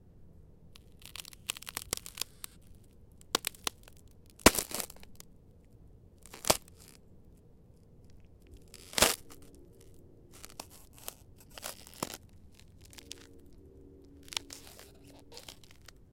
Crack Sequence UnEdited
Foot (with sneaker) stomps on ice sheet. Ice cracks, stresses and crumbles.
crack, foot, ice, outdoor, sheet, step, stress, winter